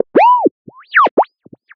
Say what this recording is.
Laser Groove
A laser processed sound.
Beam, Laser, Processed, Psytrance, Trance